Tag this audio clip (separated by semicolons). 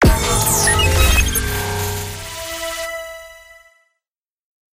bed; bumper; imaging; radio; splitter; sting; wipe